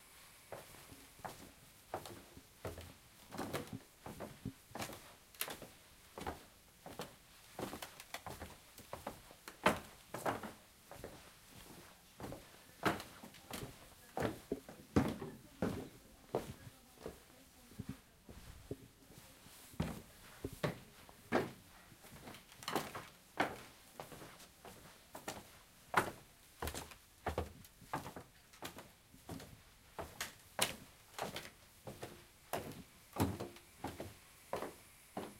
Walking on wooden floor
Walking on a wooden floor. Some creaking, walking up three wooden steps.